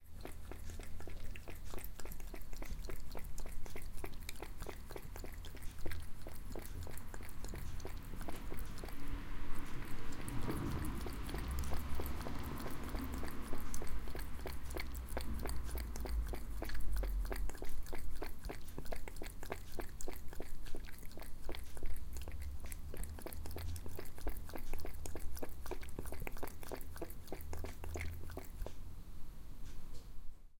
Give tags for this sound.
cat; cats; drinking

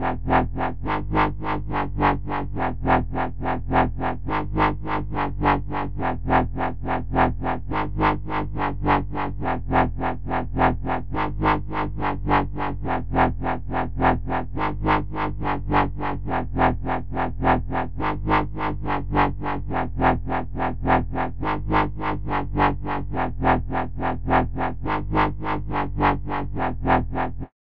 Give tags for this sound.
bpm; wobble; loop; dubstep; lfo; filter; bass; cutoff; 70

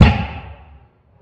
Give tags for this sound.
Alexander-Wang; Handbag; Hardware; Leather

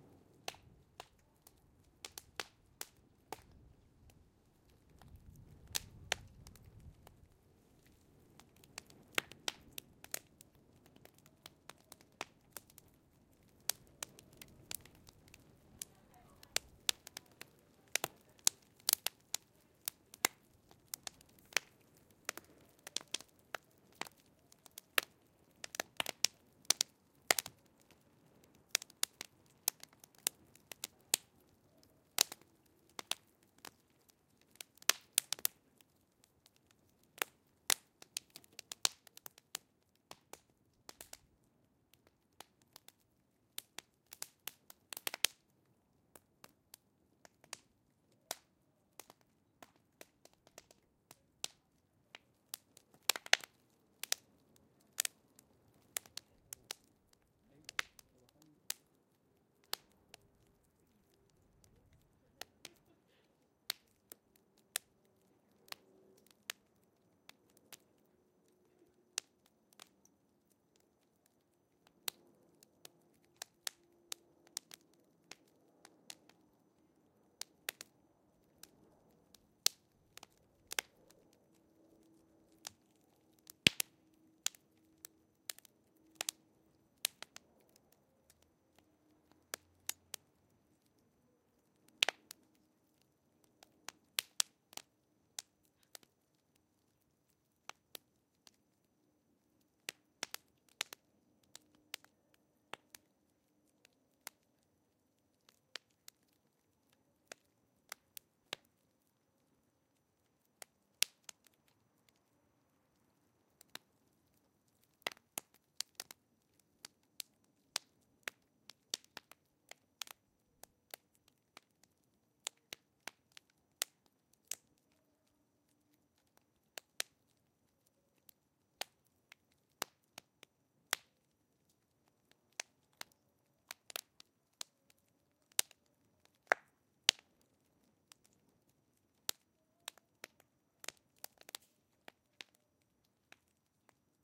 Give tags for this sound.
fire; pop; burn; field-recording; bonfire; click; wood; ember

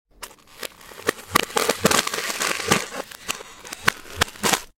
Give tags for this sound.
BREAK; cold; crack; effect; field-recording; foot; footstep; freeze; frost; frozen; ice; snow; sound; step; walk; winter

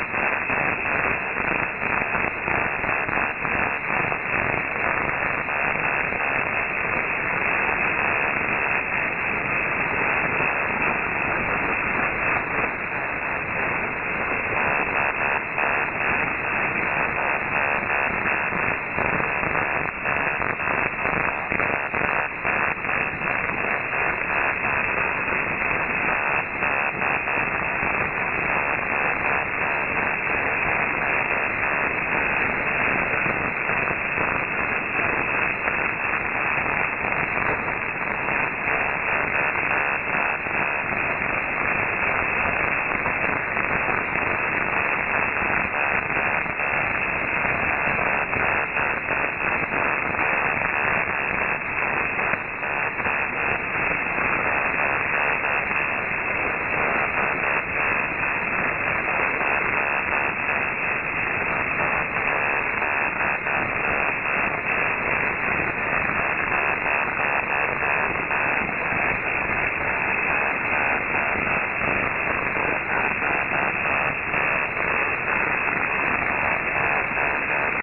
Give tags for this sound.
m; WebSDR; Shortwave-radio; Cuba; Na5B-WebSDR; 40; interference; 7-MHz; radio

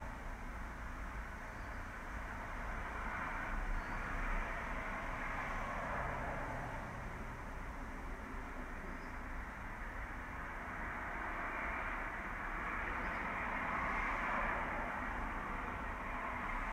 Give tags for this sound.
Bird; Birds; car; day; field-recording; Natural; Nature; Sounds; spring; Street; Wind; window; Windy

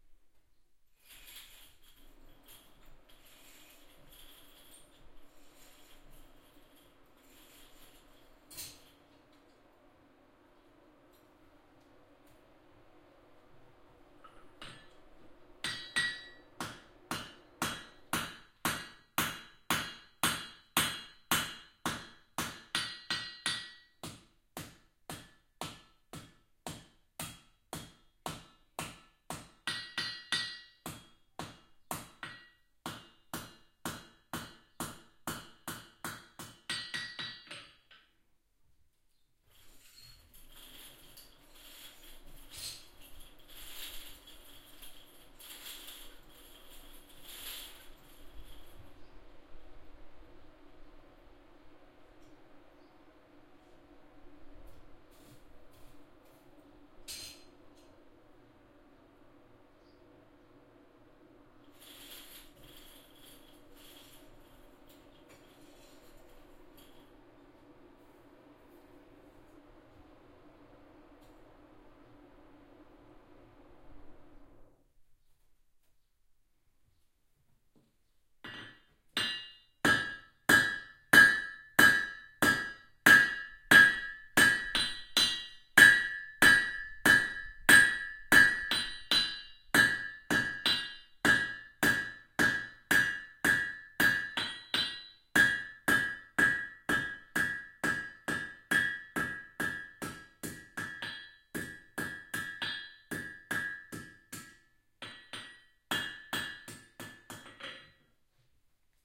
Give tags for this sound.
smithy; village; binaural; 3d-recording; field-recording; countryside; anvil; country-life; country

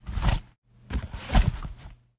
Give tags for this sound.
gun; holster; sfx

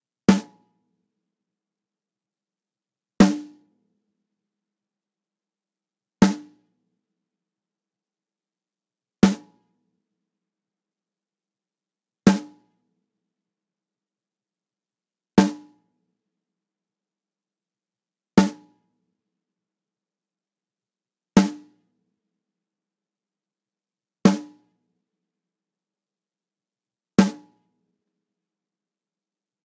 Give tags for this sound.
drum; hit; percussion; snare; snare-drum

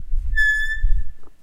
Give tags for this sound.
cacophonous; close; closing; discordant; door; gate; heavy; open; opening; portal; screech; shrill; slide; sliding; squeak; squeaky; squeek; squeeky; wood; wooden

